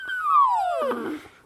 This is Ryan Driver playing the balloon for a recording project
Recorded November 2015 unto an Alesis Adat .